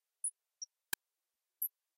Using Audition 3 to sculpt images into white noise